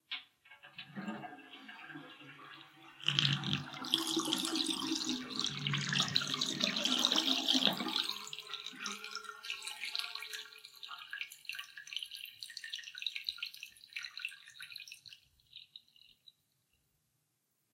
Last water out
The water going out of bathtub.
flush, slurp, water